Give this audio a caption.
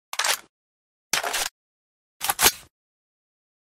m4a1 or m16 reload sound
reload sound of the m4a1/m16
M16, M4a1, reload